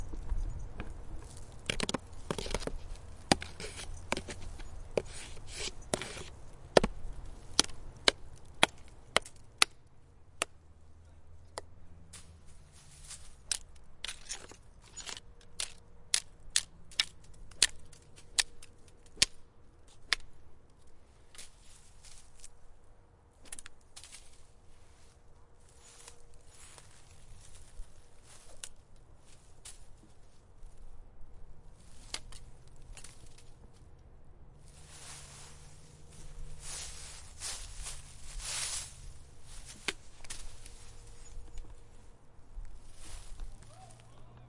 Sticks of various sizes hitting a tree and running along the trunk w/ leaves rustling
washboard, leaves, sticks